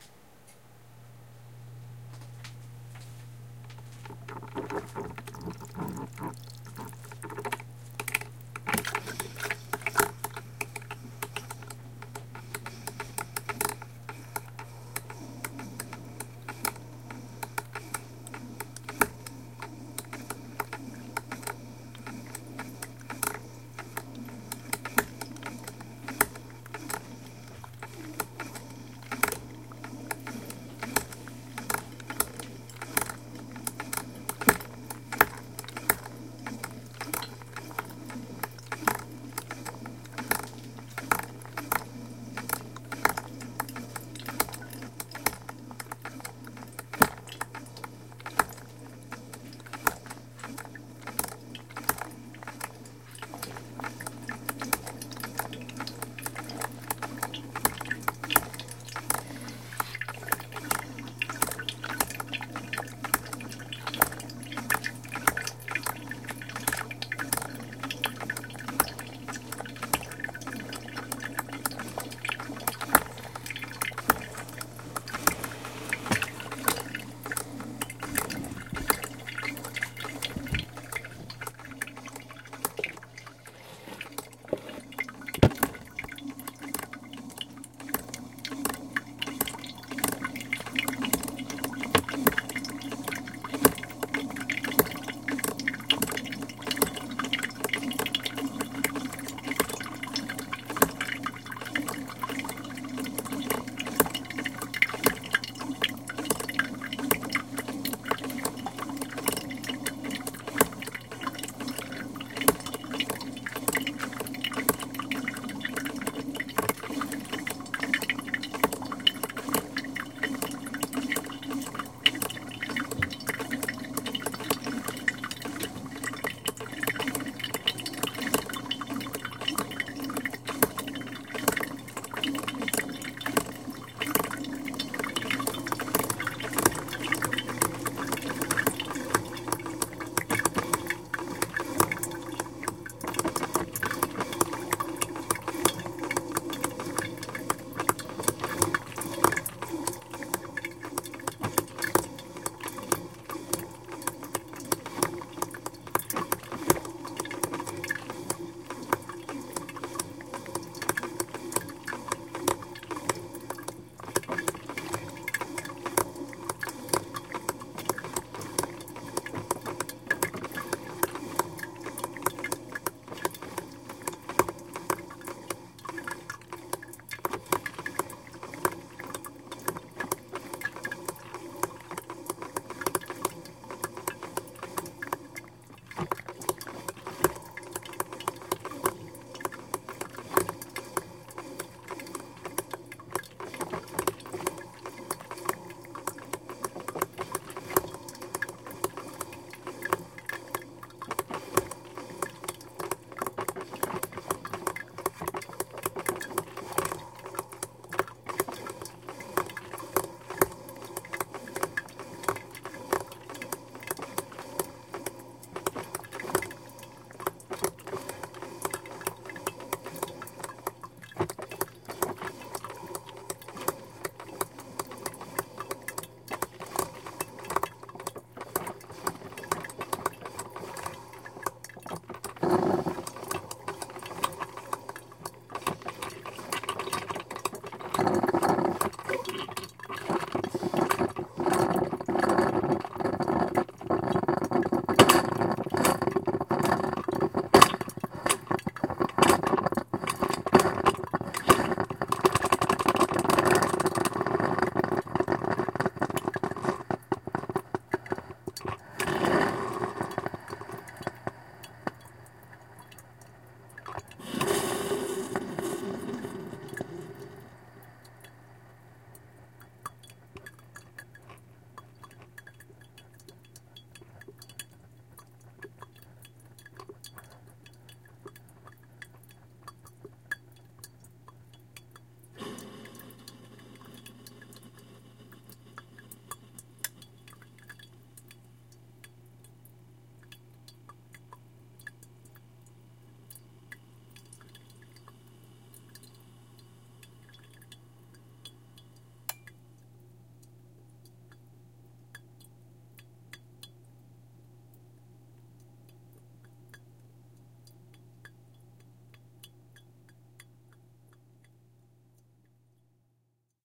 A wonderful recording symbolic of something many of us do every day -- making coffee. Recorded using my trusty Zoom H-4N recorder using its built-in stereo microphones. Recorded at 4:45 in the morning so other usual daytime house/city noises are not here.
morning-coffee, coffee, coffee-pot, water, gurgling